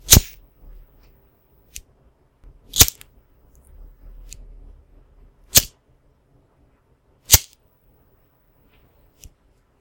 Lighter Flicks
Flicking a lighter.